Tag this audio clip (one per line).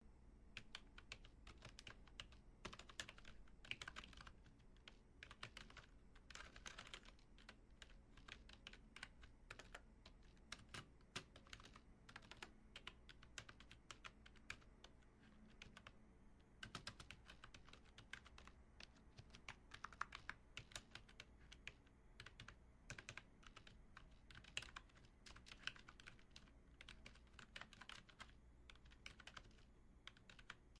computer
keyboard
typing